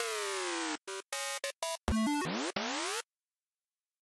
Little soundeffect which reminds of old videogame sounds.
8bit, arcade, frequency, game, machine, robot, shift, synthesizer